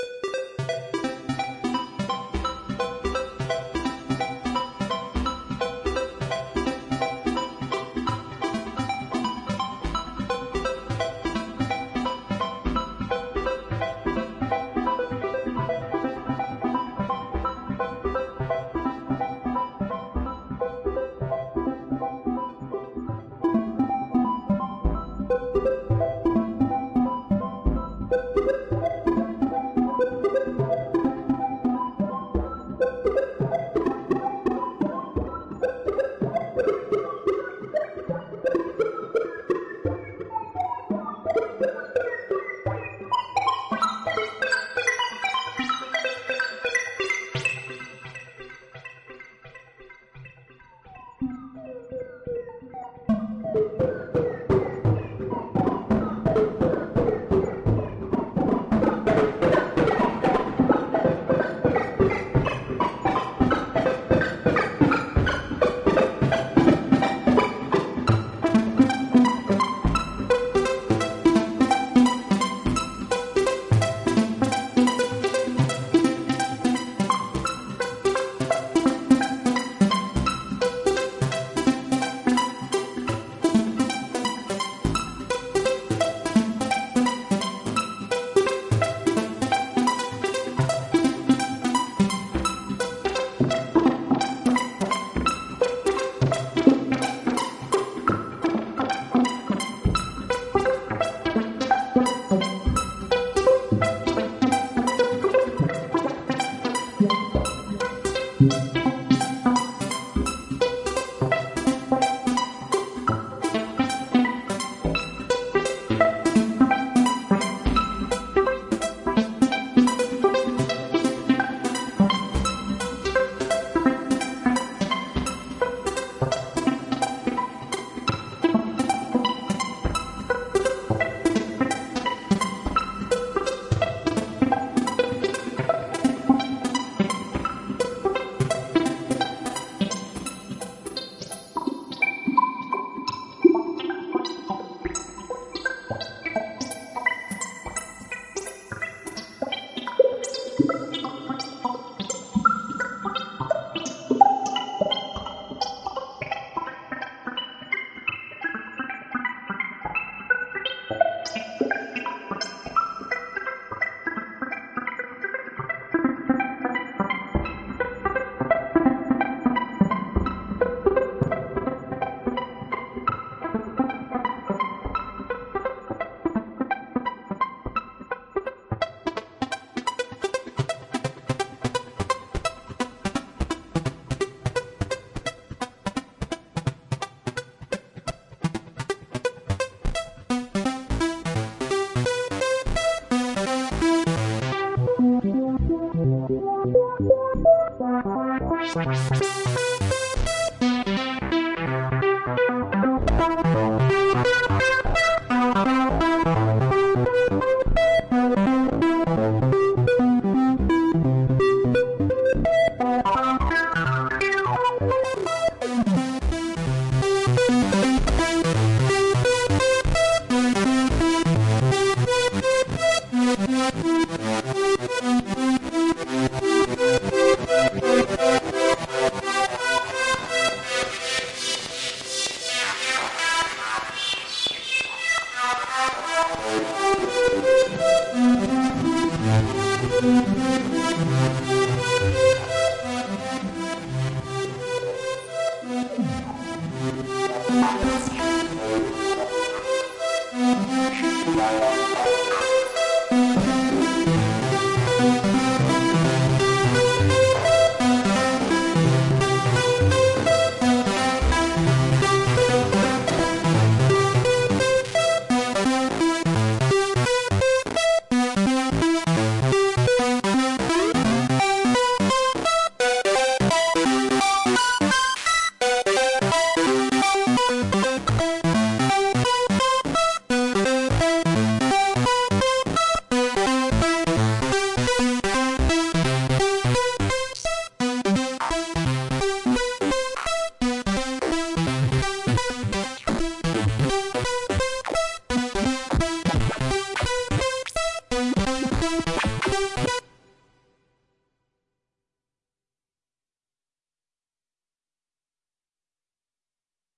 128 C Maj7 Roland
Neotrance, Synth, Analog, Synthesizer, Techno, House, Arpeggiator, 128, BPM, Cmaj7, Acid, Electronic, Roland